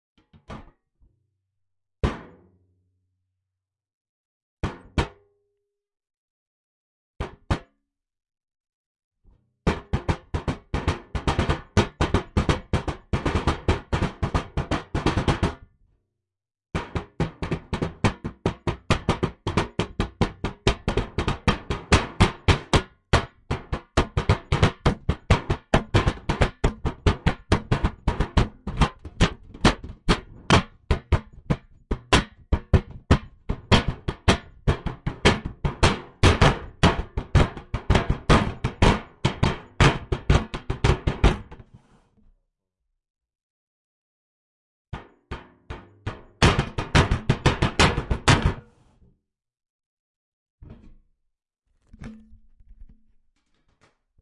Bread Box Percussion
Put a Zoom ZH1 inside of a bread box full of bagged bread and then smacked it a lot. Denoised with iZotope RX.
I'm not a good percussionist you will have to slice it up and rearrange it.
bang, box, bread, hit, metal, percussion, slap